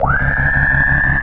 RESO SREAM D
Korg Polsix with a bad chip
bass, d